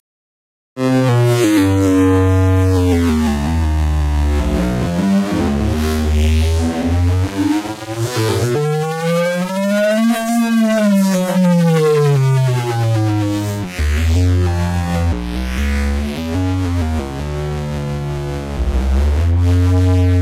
0 Coast Hardware modular synth sound design
Edited sounds from the Make Noise 0 Coast synth
0coast, design, hardware, synth